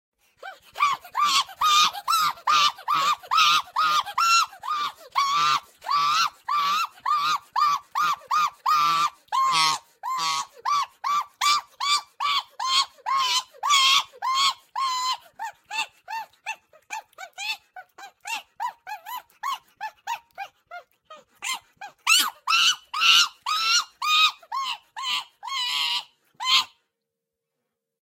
FX Monkey 01
I made a screeching monkey noise and raised my voice an octave or two for a capuchin monkey screech - a frightening effect for our Halloween episode of Mission: Rejected!